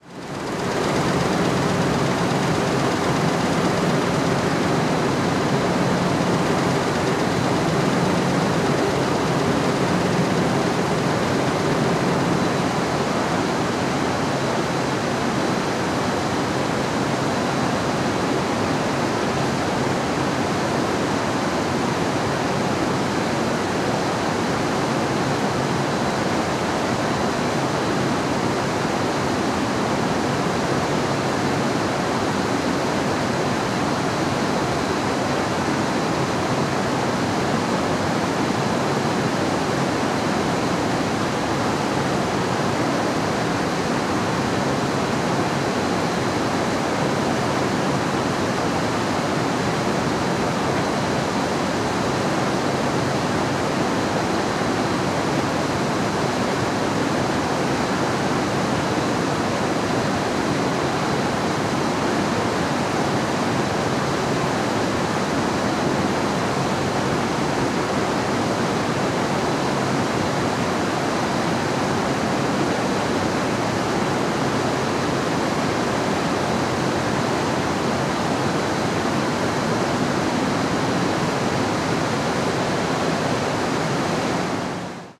Factory machine 05: mono sound, registered with microphone Sennheiser ME66 and recorder Tascam HD-P2. Brazil, june, 2013. Useful like FX or background.
ambient, atmosphere, background, BG, brazil, cinematic, engine, factory, field-recording, FX, industrial, machine, machinery, motor, Sennheiser-ME-66, Tascam-HD-P2